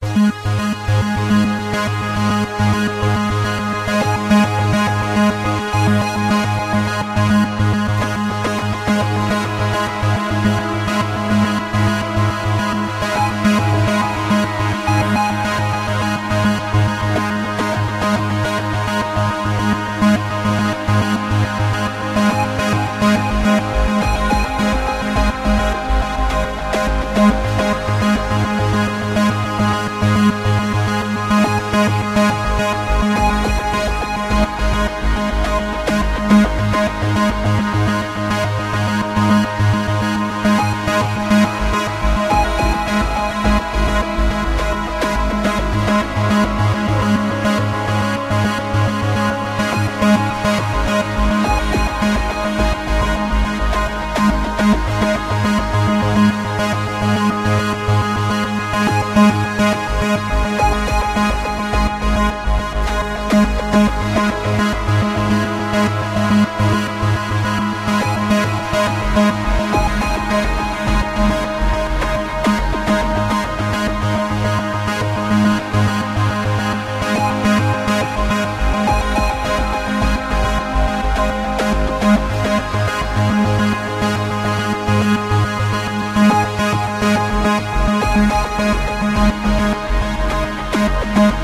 did this on ableton live hope u like it and did it on keyboard the tune